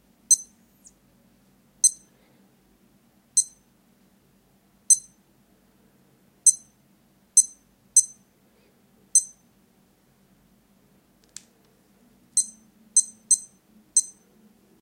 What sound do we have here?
20060614.watch.beeps
beeps produced by my electronic watch as I set the time. Sennheiser ME66 >Shure FP24 > iRiver H120 (rockbox)/ bips que hace mi reloj electrónico al ponerlo en hora
beeps, electronic, unprocessed, watch